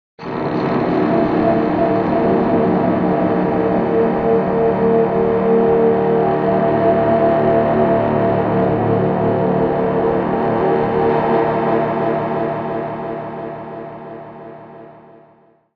Octaving horns
For creating this sound I used:
Handmade didgeridoo of pvc tubes
Sound picked by microphone AKJ-XMK03
Effects used in post:
In Guitar Rig 4:
1. Tube compressor
2. AC Box amp